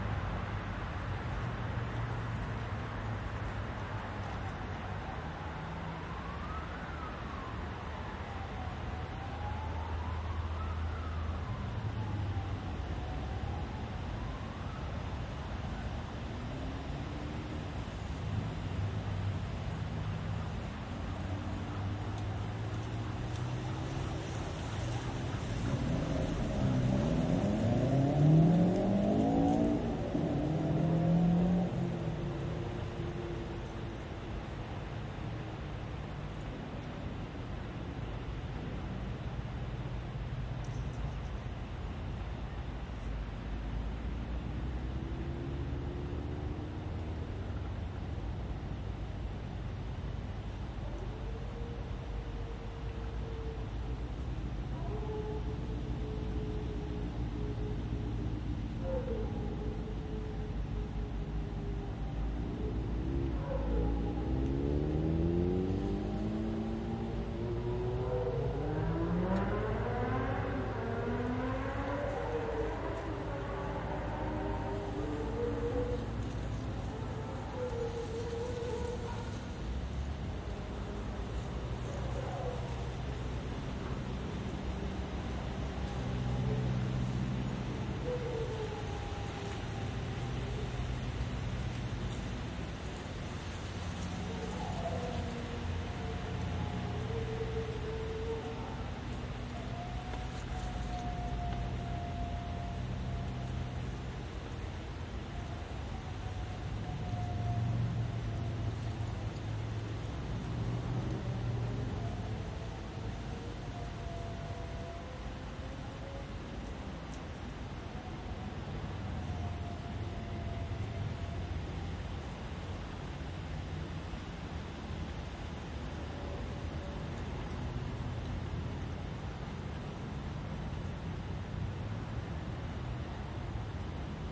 Recording of late night ambience in the suburbs, featuring distant cars, sirens, dogs and some wind through the trees.
This one feels ominous and vaguely unsafe, with a howling dog and distant revving car.
Recorded in Brisbane, Australia with a BP4025 microphone and ZOOM F6 floating-point recorder.